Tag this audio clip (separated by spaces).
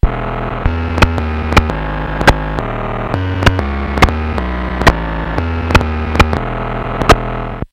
casio circuit-bent background glitch noise forground scenedrop school sfx rca if-your-crazy old lo-fi